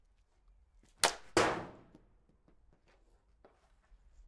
Slapshot Metal Hit5.A1
My brother shooting hockey pucks into a metal dryer.
Sennheiser MKH-416
Sound Devices 664